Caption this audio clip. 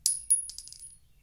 Shell 9mm luger 08
A large pack with a nice variety of bullet shells landing on the ground. (Just for you action film people :D)
I would like to note, however, something went wrong acoustically when recording the big .30-06 shells dropping to the ground (I think my recorder was too close when they hit) and so they have some weird tones going on in there. Aside from that, the endings of those files are relatively usable. If anyone can explain to me what went on technically, I would appreciate that as well.
All shells were dropped onto clean concrete in a closed environment, as to maintain the best possible quality level. (I had film work in mind when creating these.)
bullet-shell, ding